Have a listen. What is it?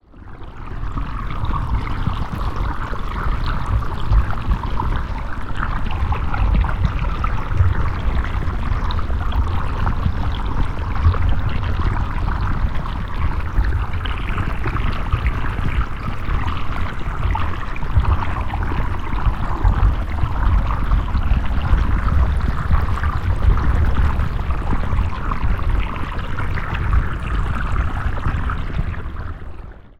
08 Stream, Dense Liquid, Trickling, Flowing, Underwater, Dive Deep 2 Freebie
ambience, deep, diver, ocean, pool, scuba, sea, underwater, water